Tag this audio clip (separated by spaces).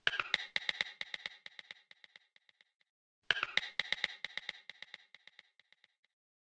dots message messenger texting three typing writing